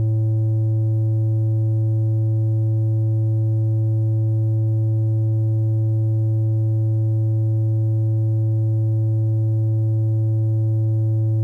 Sample of the Doepfer A-110-1 sine output.
Captured using a RME Babyface and Cubase.